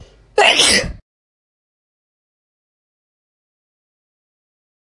Person sneezing with terrible allergies.